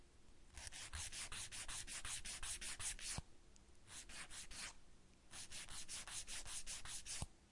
Filing fingernails with an emery board. Recorded with AT4021s into a Modified Marantz PMD661.